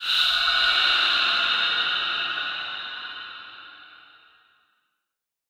Ghost Scream
creepy, ghost